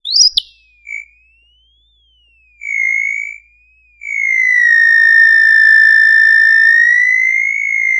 I made this sound in a freeware VSTI(called fauna), and applied a little reverb.